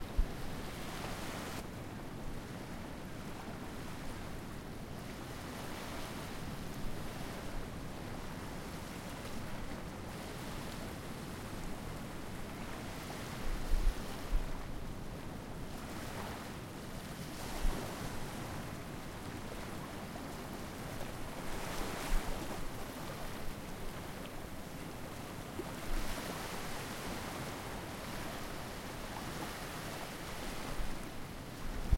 Esterillos Mar Costa-Rica Océano Pacífico
Morning in the sea
Beach, Costa-Rica, Ocean, Sea, Wave